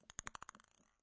ping pong pan
pan, ping